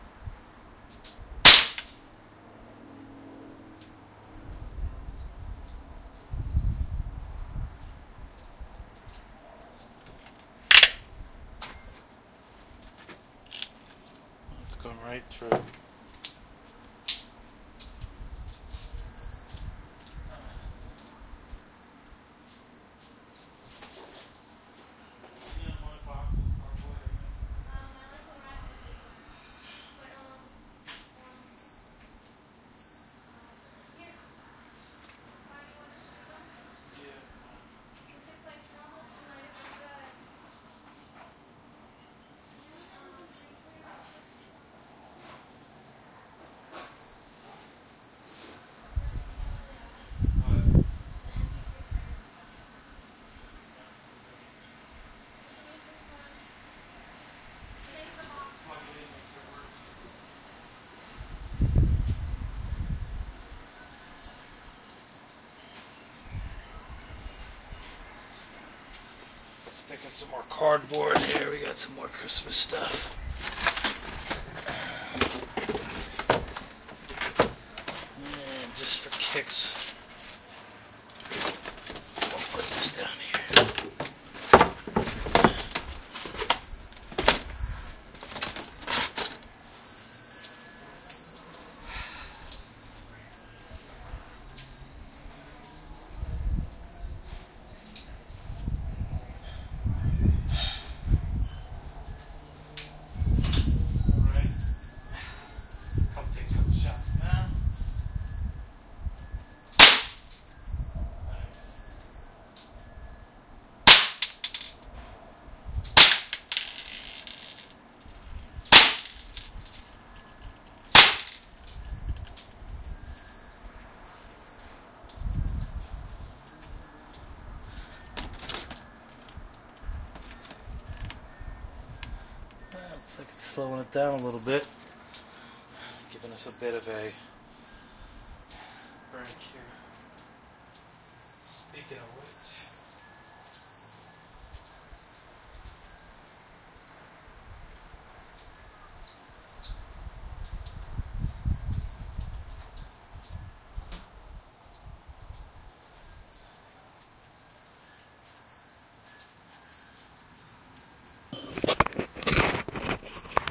Shooting the new Powerline 15XT with laser sight recorded with DS-40.
air, bb, gun, pistol, plinking